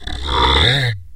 low.arc.03
daxophone, friction, idiophone, instrument, wood